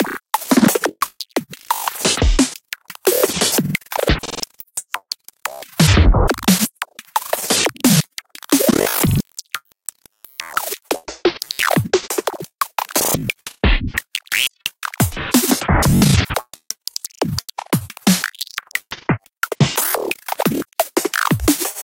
Weird Drum Loop 176bpm
Playing with the pitch on a drum loop, thinking it could be used for drum fills or chopped into percussion. Here's how to make breaks that sound like this (in Ableton) - pick a break, set the warp mode to beats, record the track output to a new track, then play around with the break's pitch knob while recording. In this upload's case I repeated this process for more weirdness.
176bpm, dnb, drum, idm, loop, pitch-shifted, processed, spyre